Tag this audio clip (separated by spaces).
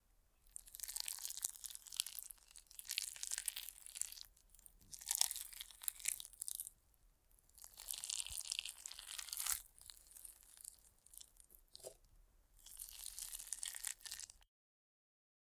Foley Gross Slosh Squish Wet